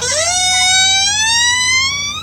a creaking door recorded with a condenser mic. sounds normalized in ReZound.